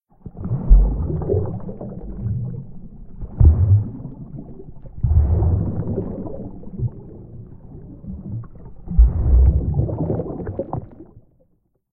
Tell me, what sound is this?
Under Water Splash 7
A short out-take of a longer under water recording I made using a condom as a dry-suit for my Zoom H4n recorder.
Recorded while snorkeling in Aqaba, Jordan. There we're a lot of beautiful fish there but unfortunately they didn't make a lot of sounds.
sea, bubbles, ocean, under-water, field-recording